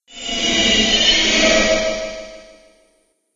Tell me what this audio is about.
Future Metal Motion
A Sci-Fi sound effect. Perfect for app games and film design.Sony PCM-M10 recorder, Sonar X1 software.
effect, free, future, futuristic, fx, metal, metallic, motion, move, noise, robot, robotic, science-fiction, scifi, sf, sfx, sound, sound-design, sounddesign